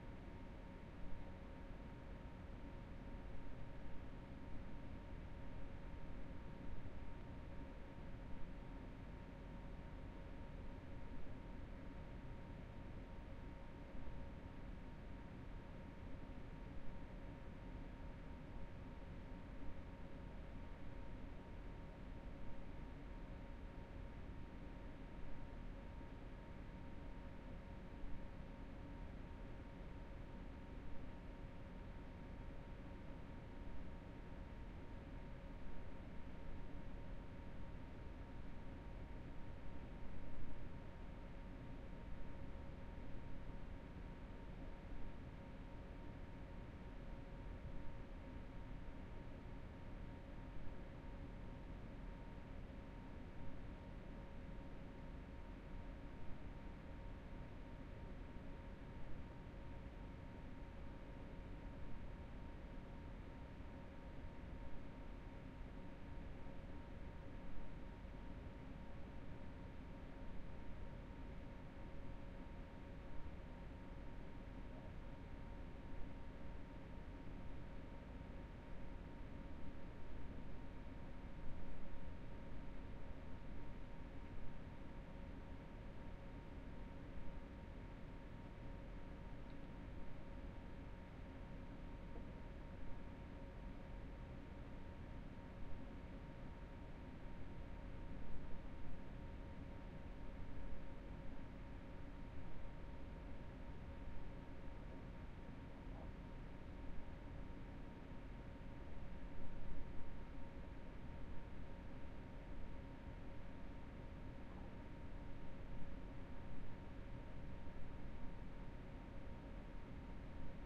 Room Tone Office 13
Ambience, Indoors, Industrial, Office, Room, Tone